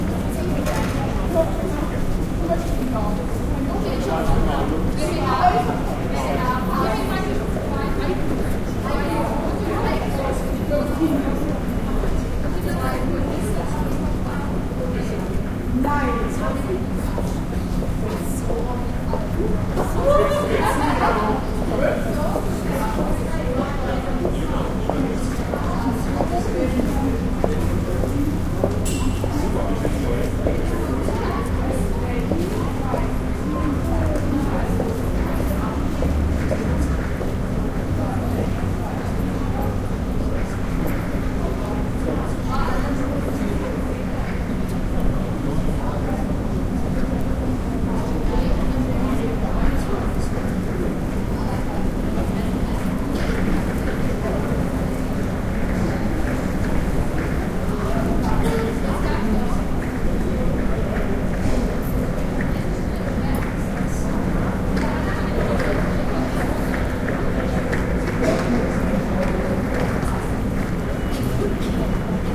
Recording of a pedestrian zone. People talking (German, but incomprehensible). Recorded on an Edirol R-09 with built-in mics.
city, pedestrian, zone